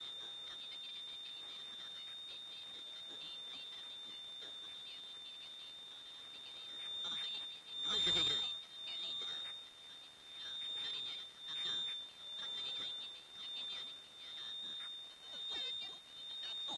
Short radio interference